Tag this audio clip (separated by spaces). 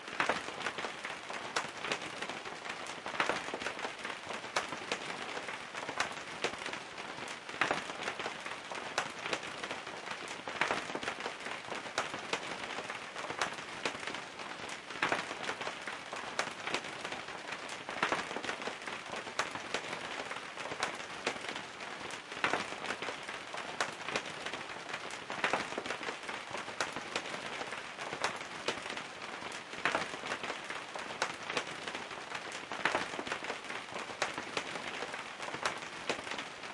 atmoshpere fx loop rain sounddesign soundeffect stereo texture water weather